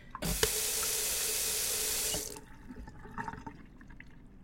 Turning on faucet then turning it off